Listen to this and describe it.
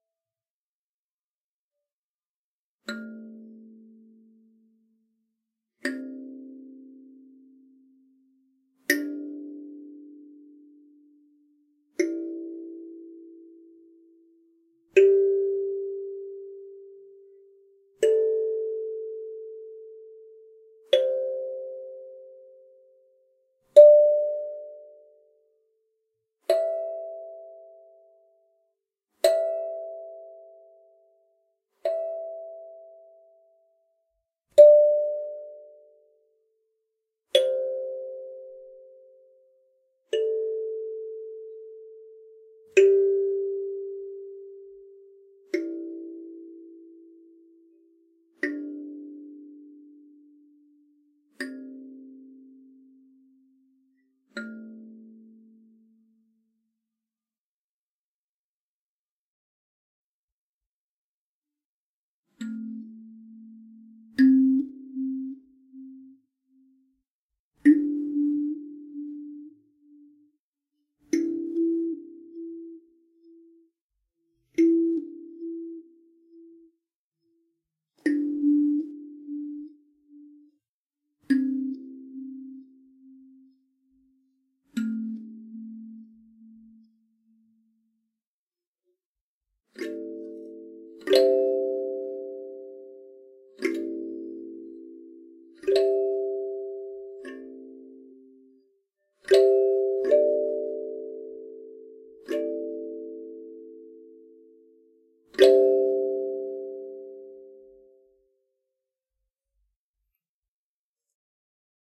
mbira, kalimba, thumb-piano i recorded for a friend. applied noise reduction so some artifacts are audible, but other wise a decent recording. it includes the notes, and some chords and waw waw fx caused by intermittently blocking a hole in the kalimba's resonant body.